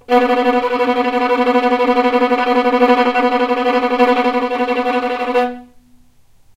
violin tremolo B2
tremolo, violin